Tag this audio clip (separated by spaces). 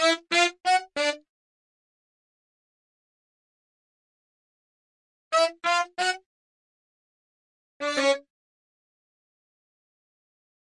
090 14 A Bmin Modern Reggae Roots Samples